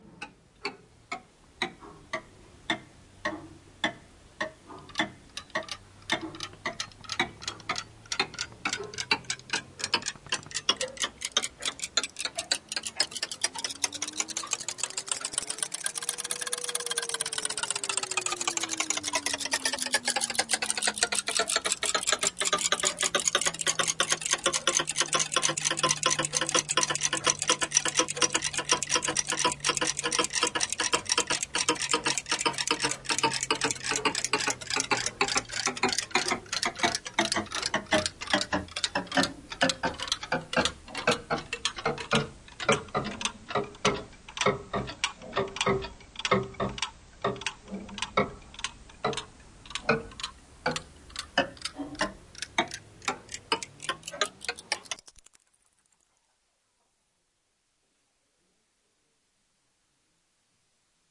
crazy toy
to make it sound weird and meancing. It's a mix of clocks with pitch changed in various ways